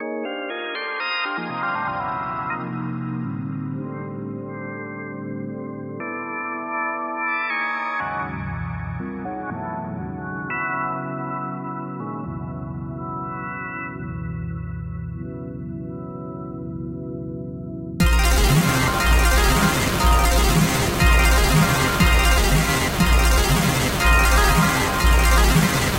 cr8zy synth arpz
arp, arpeggios, electro, electronic, loop, psychedelic, synth, synthesizer